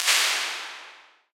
I loaded a slew of random IR files into Kontakt, played them through other IRs and into the Plate140, FairChild670, and Neve1073 plug ins off the UAD card. Then I selected 5 good hits and applied Logic's offline compression and destructive fade envelopes. I loaded them into Space Designer and it produces deep/bright/spacious reverbs.
reverb, uad, convolution